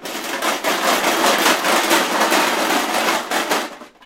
aluminum cans rattled in a metal pot